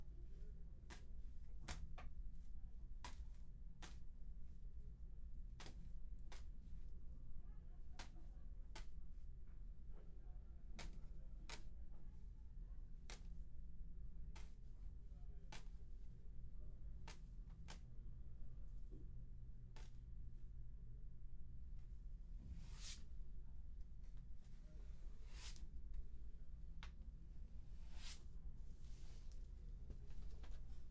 8. Pasos de ave Bird steps
Bird looking creature walking on wood floor, made with a weird belt